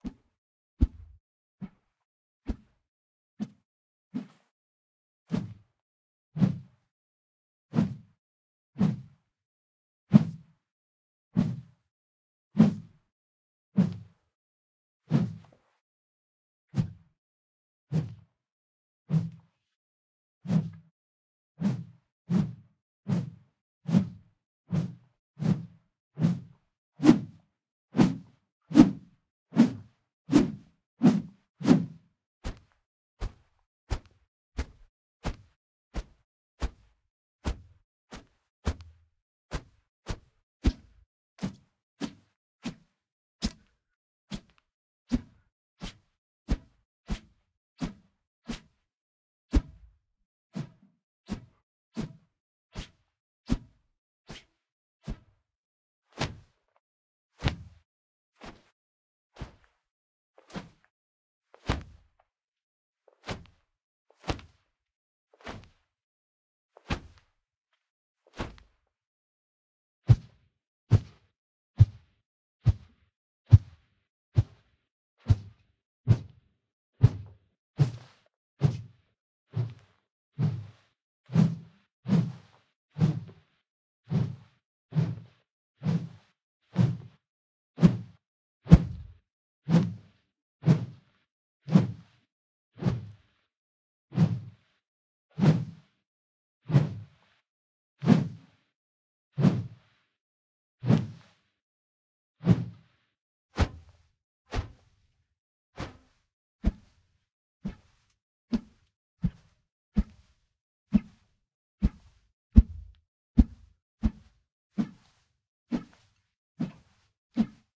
Whoosh Swipe Fight Sounds Sword Air
preamp, UA, MKH416, Whoosh, Air, sennheiser, Sword, Swipe, studio-recording, Sounds, shotgun-mic, Fight, universal-audio